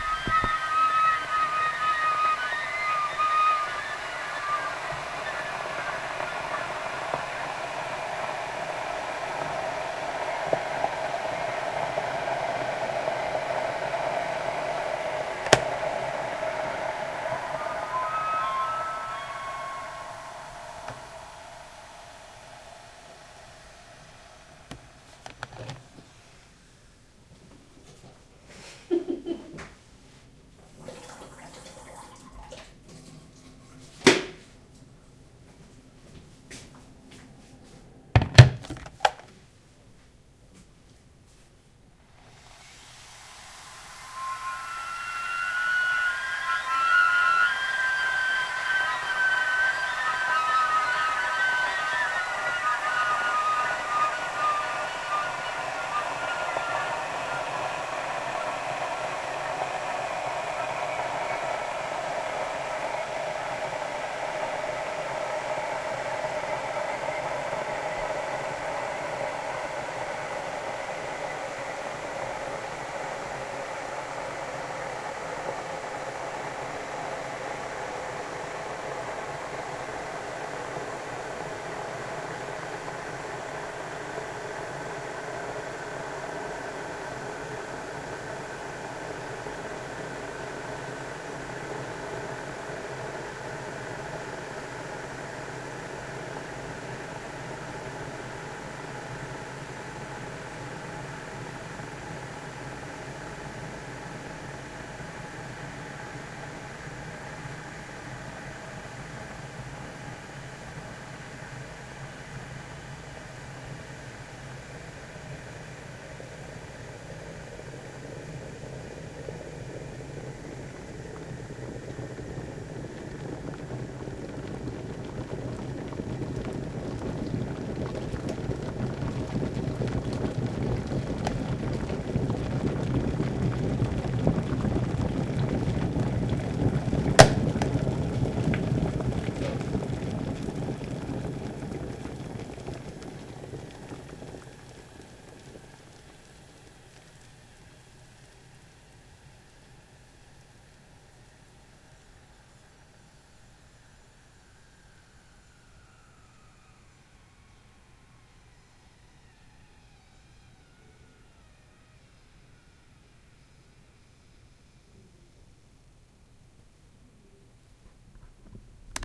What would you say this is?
boil water
Temperature slowly rises. At around 70 degrees there is this funny wishling